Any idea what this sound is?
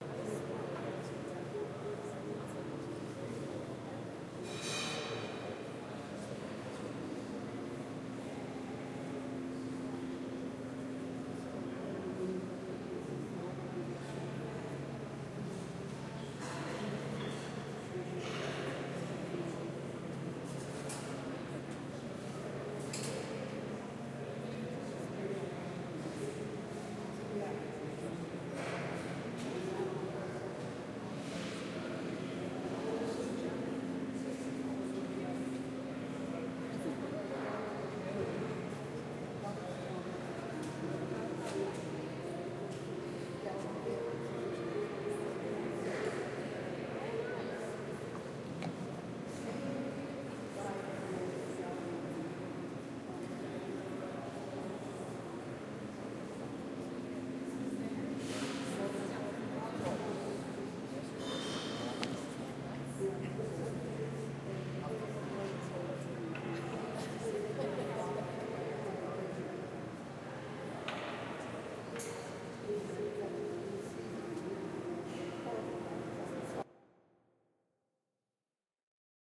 Museum Cafe
Detroit Institute of Art Cafe